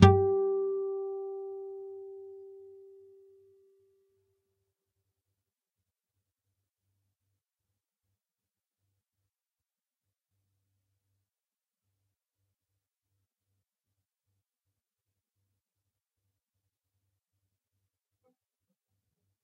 Single note 12th fret E (1st) string natural harmonic. If there are any errors or faults that you can find, please tell me so I can fix it.